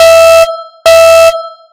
Alarm-02-Short
Alarm to use with a loop